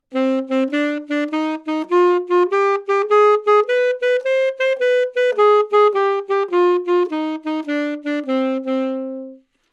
Sax Alto - C minor
Part of the Good-sounds dataset of monophonic instrumental sounds.
instrument::sax_alto
note::C
good-sounds-id::6656
mode::natural minor
scale, good-sounds, neumann-U87, sax, alto, Cminor